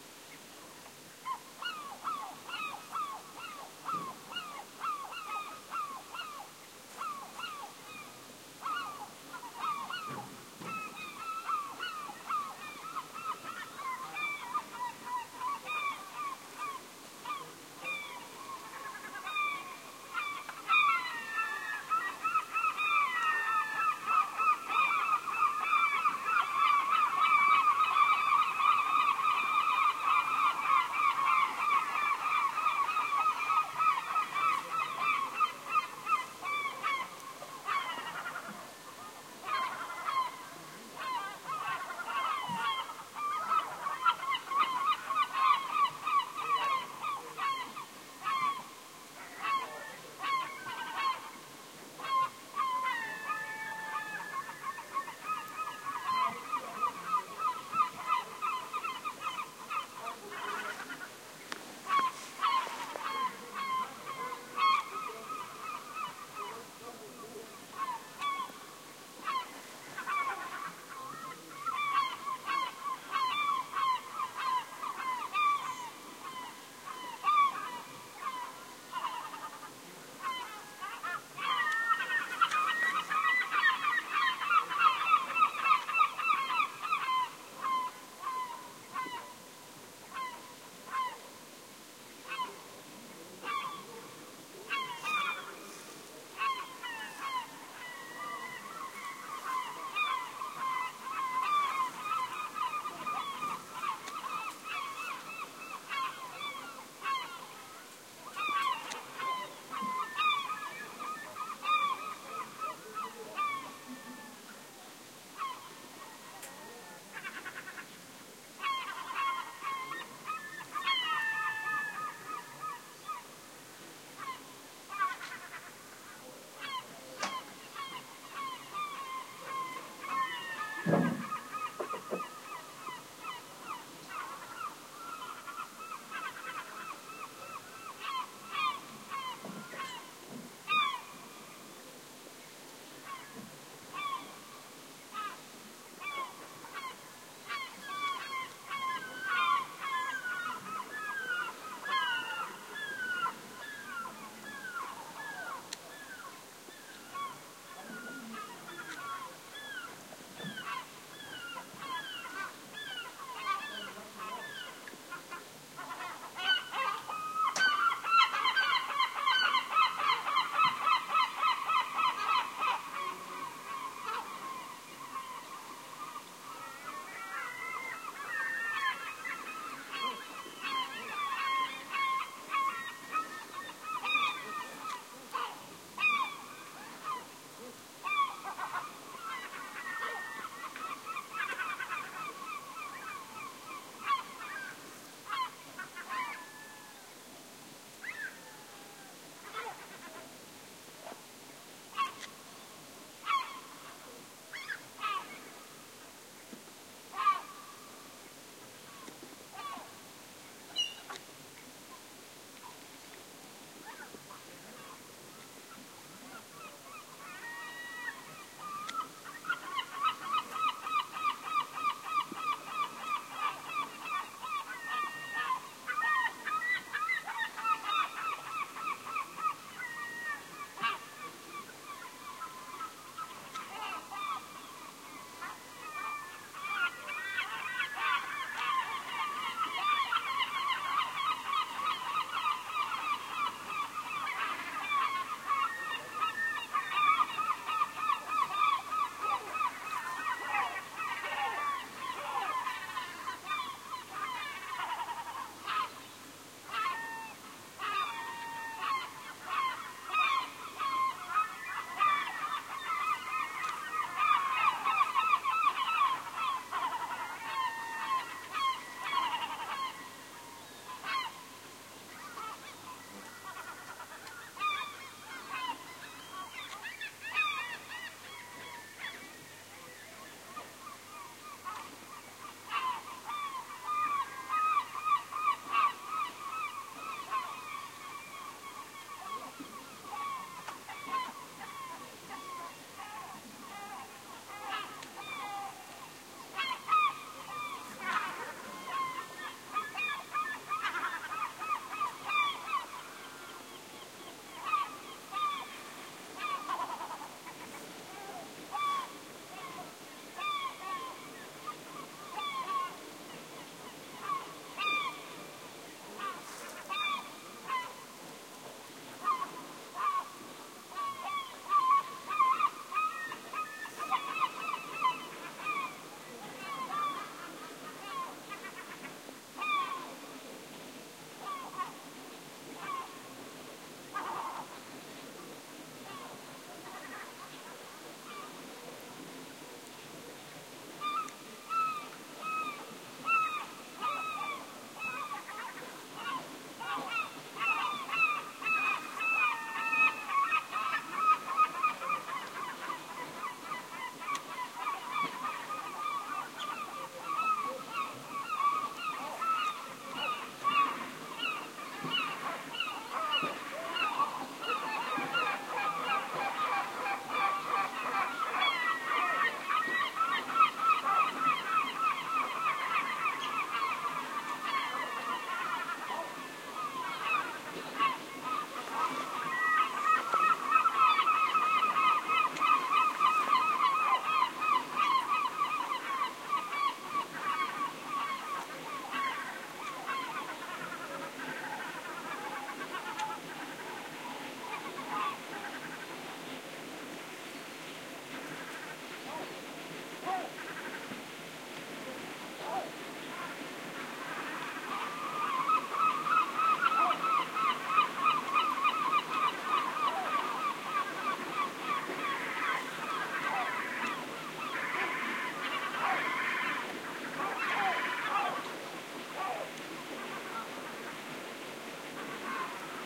20150720 seagull.colony.distant
Longish, nocturnal take of seagulls screeching at some distance near Ringstad, Vesteralen, Norway. Primo EM172 capsules inside widscreens, FEL Microphone Amplifier BMA2, PCM-M10 recorder